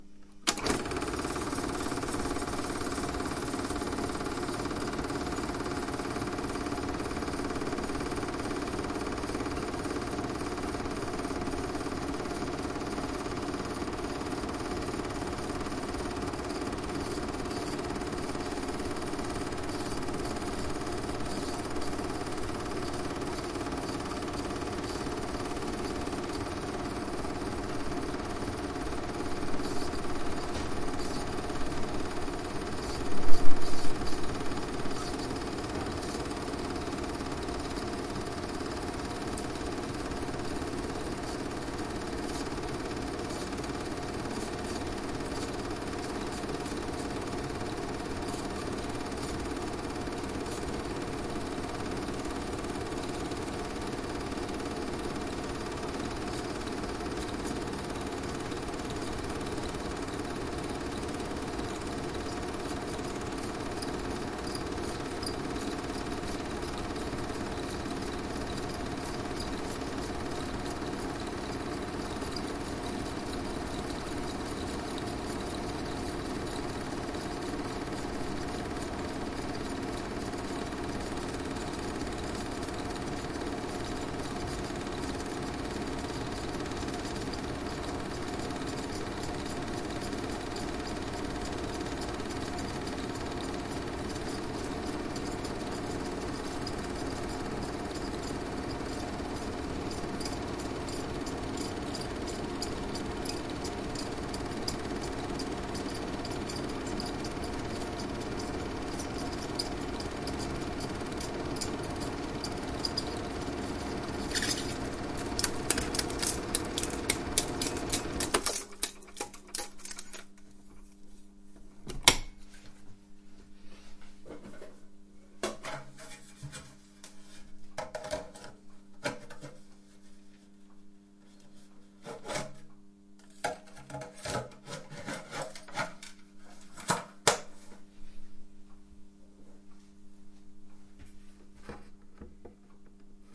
8mm Projector Close Rewind
Sound of rewinding an 8mm film reel with a "Braun Visacustic 2000 digital". Close-up recording with a Tascam DR-05
noise, motor, super8, projector, machine, mechanical, 8mm, film